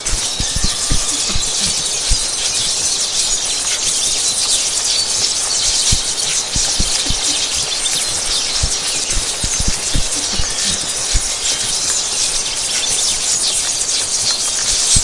Chidori (raikiri) - Thousand birds
A chidori sounds form Naruto, made with my mouth and recording of birds...THOUSAND BIRDS!!!!
thousand, raikiri, Hatake, Naruto, chidori, Kakashi, birds, Sasuke, energy, fantasy, Uchiha, electricity, rasengan